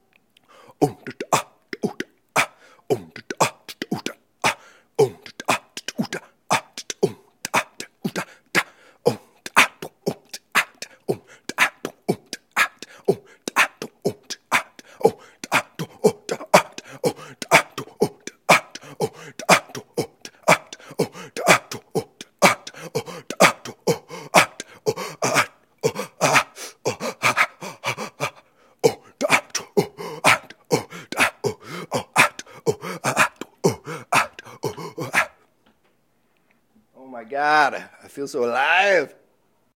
Tribal-esque percussion beat. Strange chanting/breathing beat - all done with my vocals, no processing.